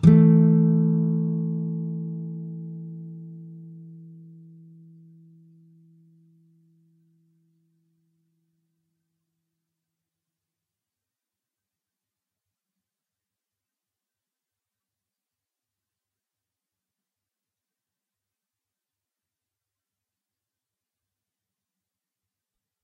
A & D strs 5th fret
The 5th fret on the A (5th) and D (4th) strings in a chord. Sounds good with C_2_strs (up 2 semitones to a D_2_strs) and D_rock.
two-string-chords, nylon-guitar, guitar, acoustic, chords